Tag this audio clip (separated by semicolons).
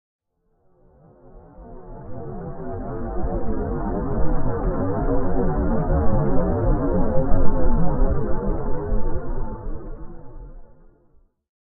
artifacts; dark; lo-fi; noise; pad; soundscape